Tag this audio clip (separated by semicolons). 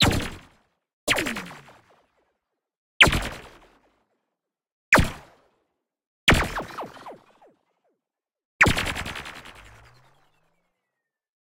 Shoot; Gun; Lazer; Weapon; Sci-Fi; SciFi; Space; Laser